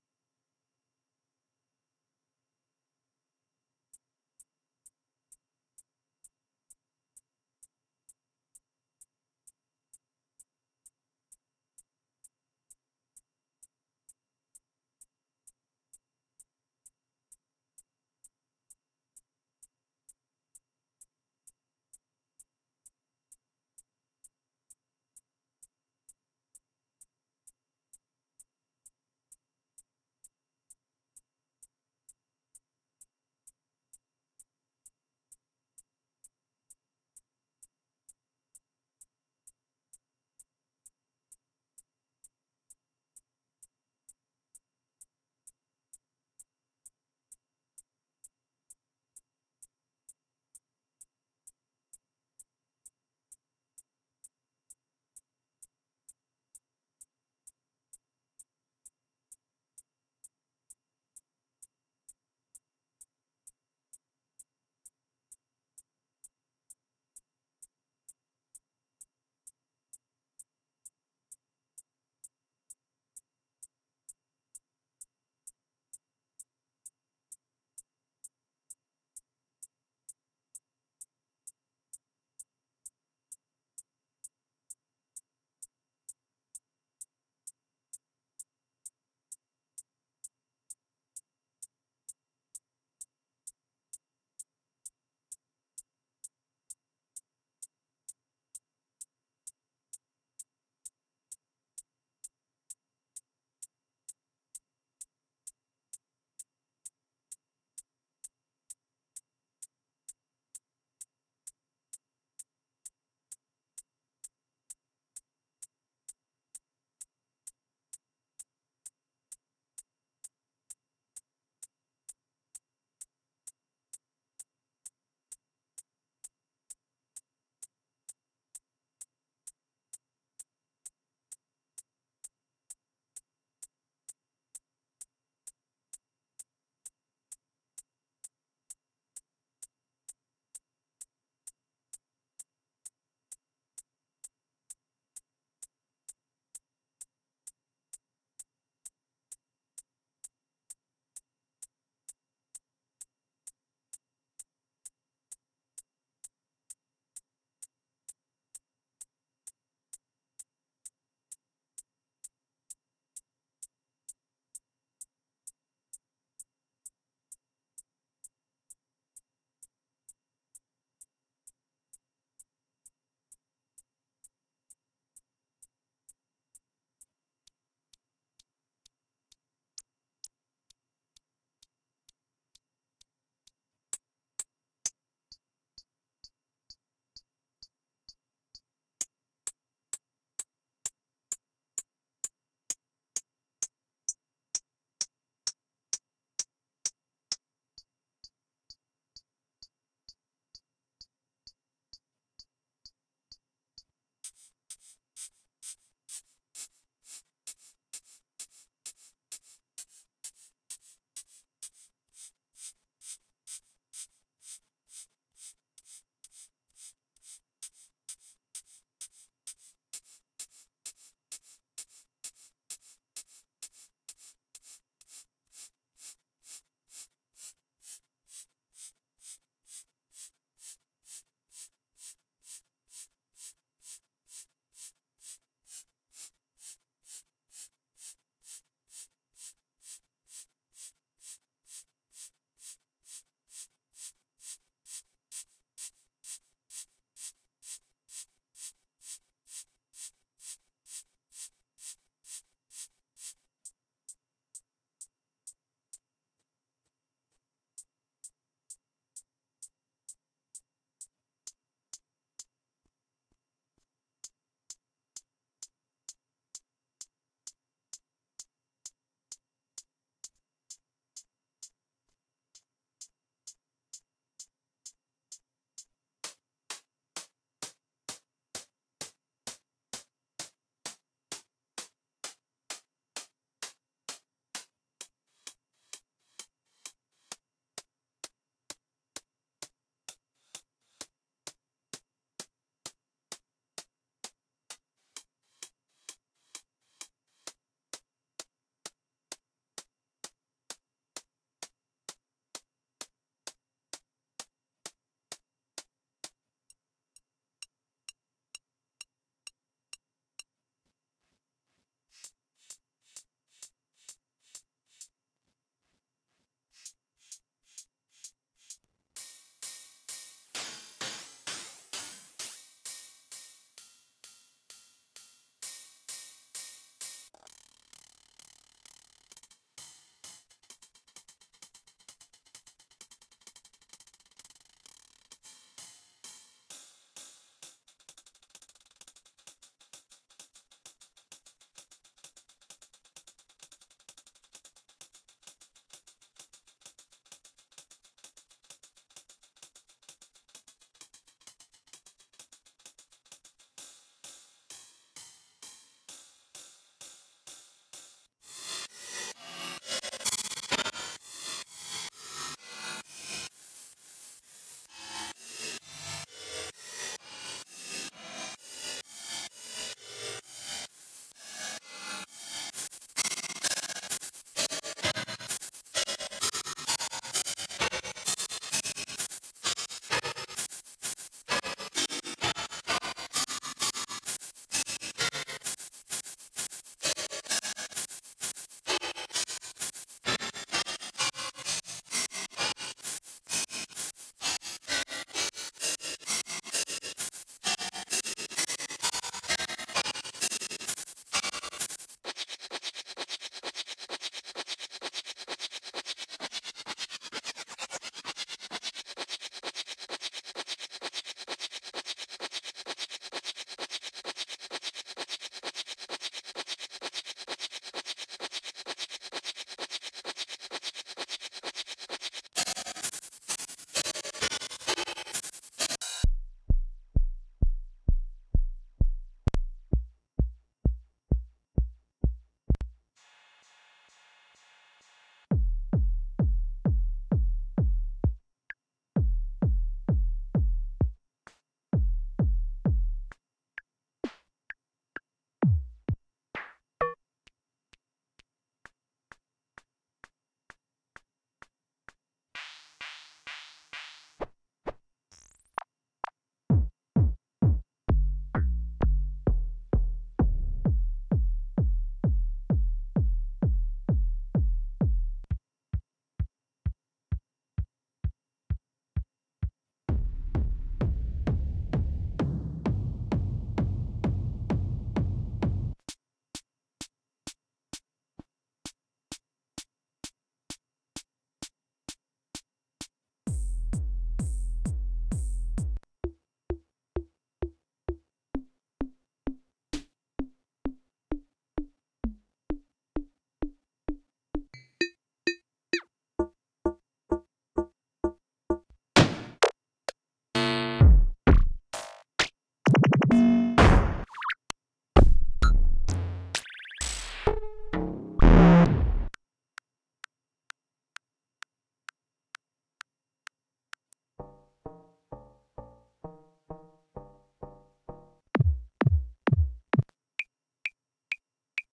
Hi-Hat modular morph